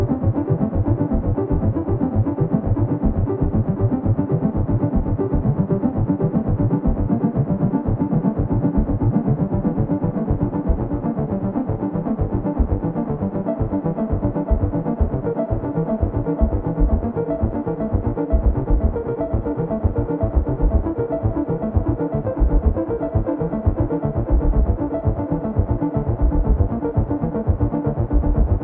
Another sequence with the Doepfer Dark Time running through a Software synth.